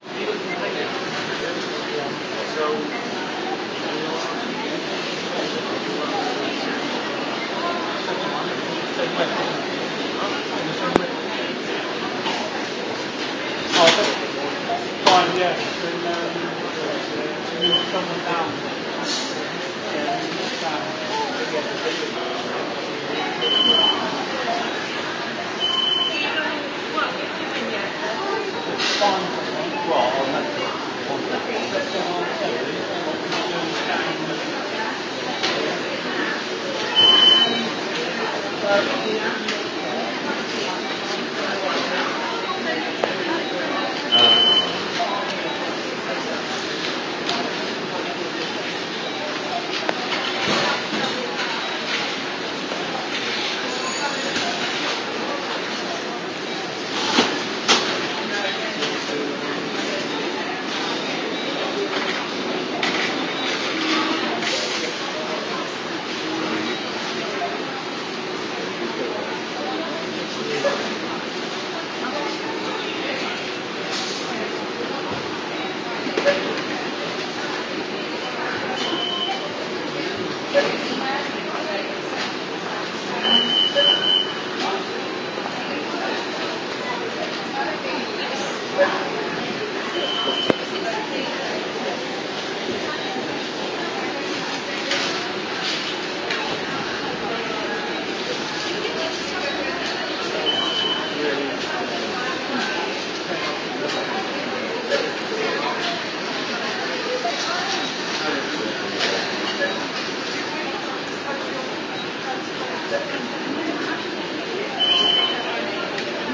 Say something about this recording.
Supermarket Ambience Marks and Spencer
Ambience recording in M&S; with automated self-service tills etc. Recorded with an app on the Samsung Galaxy S3 smartphone
ambient, automated, beep, beeps, cash, machines, register, self-service, shopping, supermarket, tills